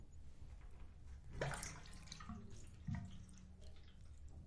bath stopper
ZOOM H6